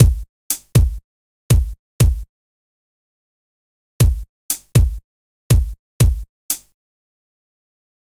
kick closed hat loop 120bpm-02
120bpm, beat, closed, drums, hat, hip, hop, kick, loop, quantized, rhythm, rhythmic